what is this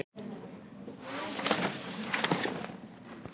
Books going into the bag
bag, books, into